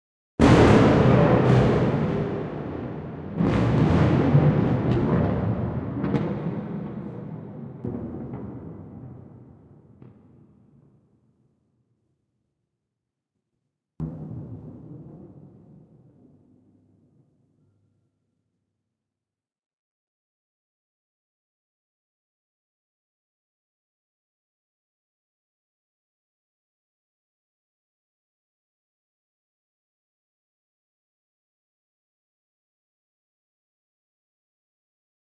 sfx for rocky horror show. doctored up a thunder sound when asked for "future thunder".